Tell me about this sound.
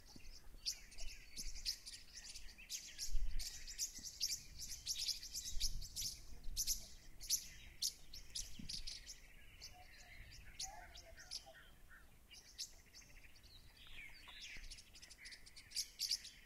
Rural Sound - Birds 2
| - Description - |
Ambient sound of birds singing in a very calm rural area
birds, nature, birdsong, bird, forest, field-recording